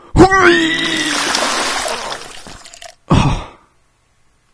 human vomit puke barf
the sound of someone puking
barf, vocal, human, vomit, voice, male, puke